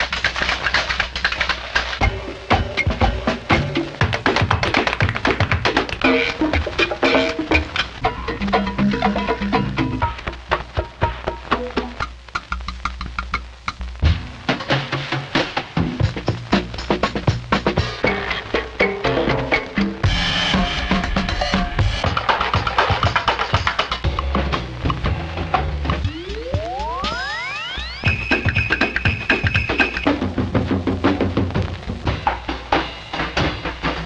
Optigan Drums MG Reel
The second in our series of classic hardware drum sounds, this Reel of 17 drum patterns were taken from our collection of discs for the Optigan!
Designed by Mattel and available from 1971-1976, the Optigan was an organ that used an optical playback system to interpret drawings made on clear discs as sounds. These sounds included organs, guitars, voices and, in some cases, drum loops.
The 17 drum patterns in this Reel exist as individual Splices, all formatted to loop at the same rate— patch EOSG to your favorite clock divider/multiplier and create new rhythms and sequences based around the Optigan loops!
As always, experiment and have fun!
Be sure to check out our Reel of drum patterns from the Casio SK-1 as well:
For more information on the Morphagene, head to our website: